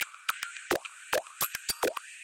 filtered laser tap rhythm